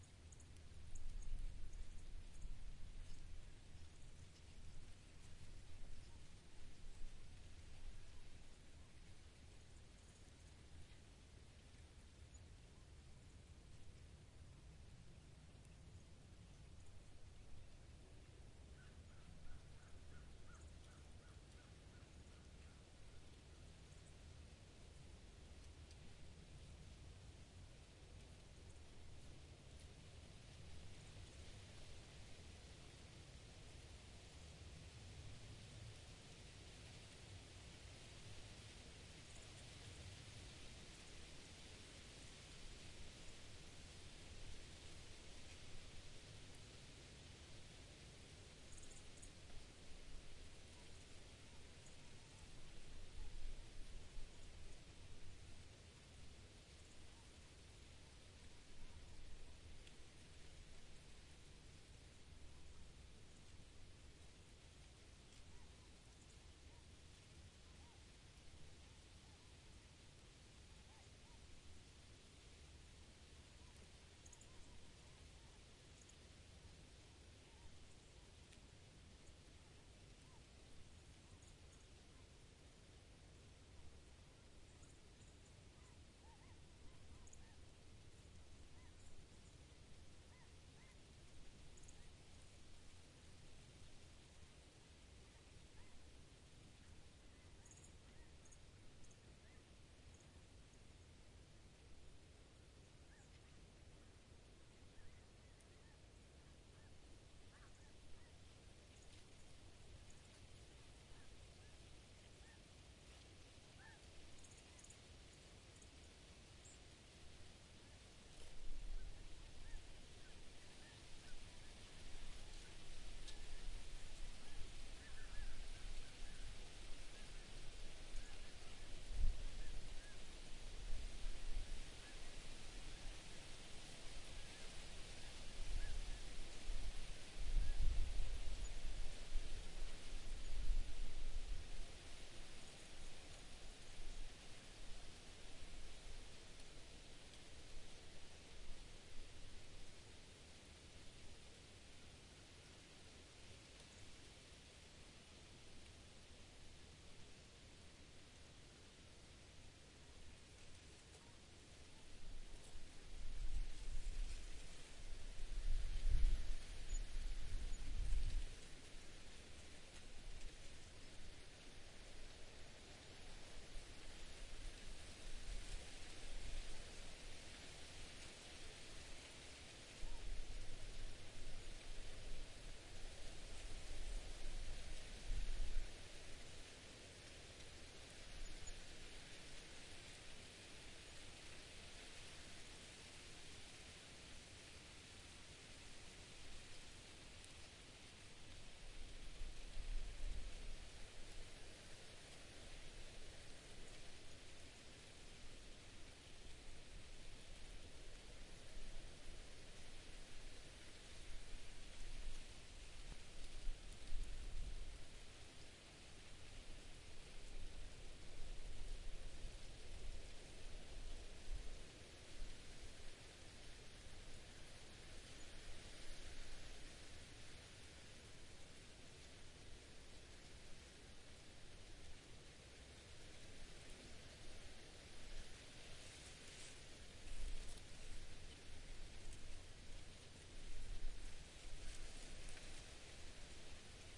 Wind and Leaves
Wind in a small clump of broad-leaf trees.
ambience, calming, windy, wind, leaves, nature